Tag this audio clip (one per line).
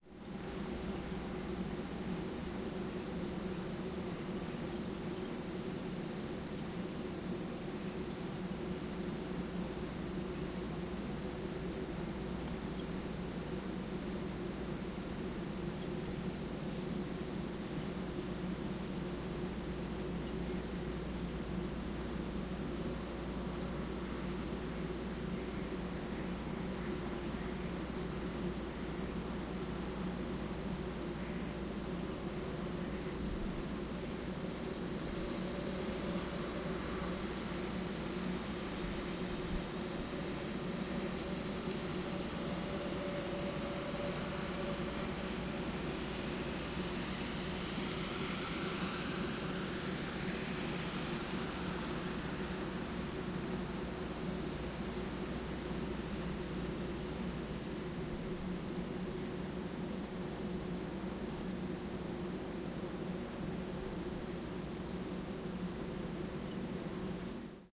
chopper
field-recording
helicopter
manhunt
police
search